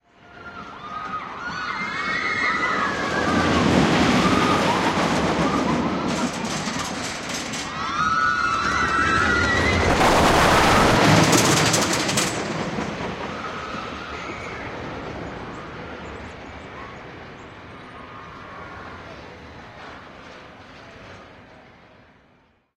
SCB005 Rollercoaster001
A small field recording of a roller coaster at Santa Cruz Beach Boardwalk, California USA.
machines, screaming, parks, rides, santa-cruz, carnival, usa, field-recording, ambient, speed, panned, california, amusement, beach-boardwalk, fun, fair, roller-coaster, thrill, rails